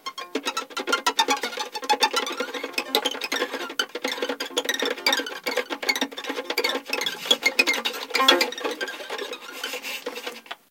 random noises made with a violin, Sennheiser MKH60 + MKH30, Shure FP24 preamp, Sony M-10 recorder. Decoded to mid-side stereo with free Voxengo VST plugin.
bowed, fiddle, noise, percussion, strings, violin